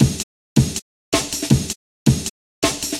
Old skool jungle break with a dancehall feel.